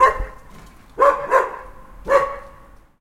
Sound of dogs. Sound recorded with a ZOOM H4N Pro and a Rycote Mini Wind Screen.
Son de chiens. Son enregistré avec un ZOOM H4N Pro et une bonnette Rycote Mini Wind Screen.
animal, bark, barking, dog, dogs, hunting-dog, pet, pets, spaniel